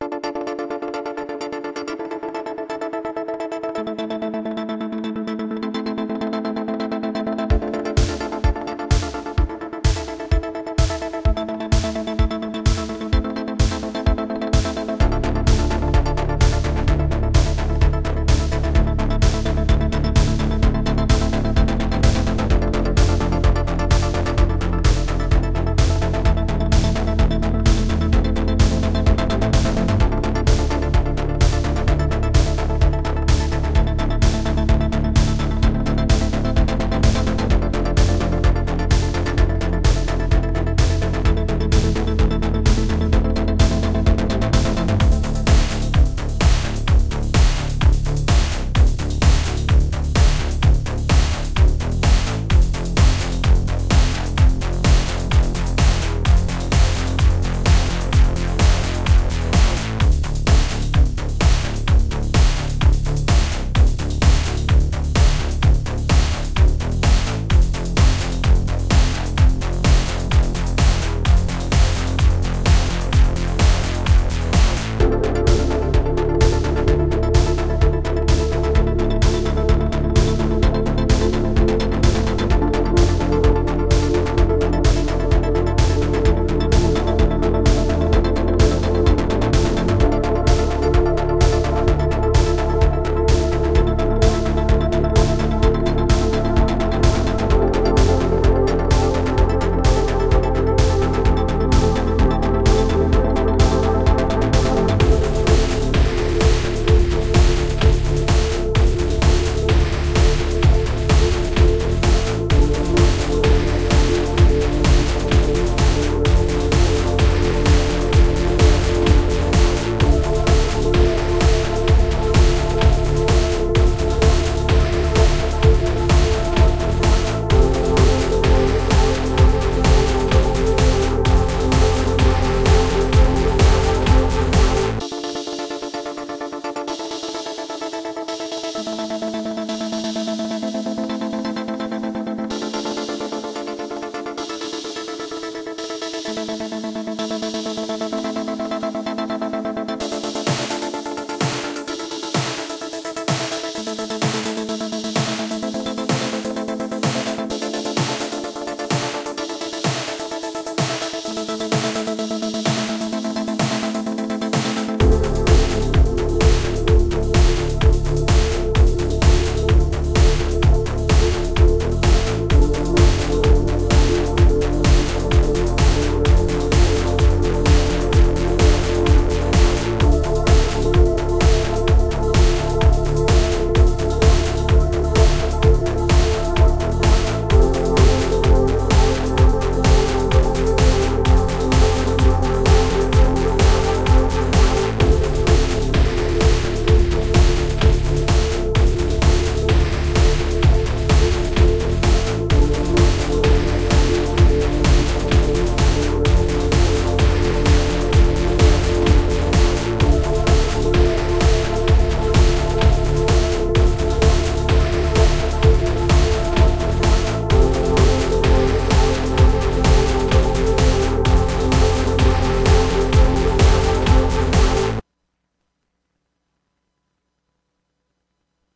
Synthwave / Cyberpunk Loop Created Using Ableton 10
March 2020
beat, digital, drum, electronic, experimental, groove, loop, noise, outrun, processed, retro, vst